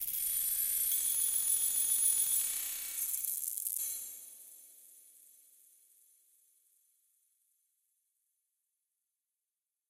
Keys fx1
Effected keys sound
clefs, fx, keys, stretch